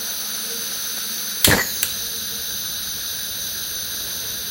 gas that escapes from a stove is ignited with a lighter / gas saliendo de una cocina se enciende con un encendedor
field-recording, fire, gas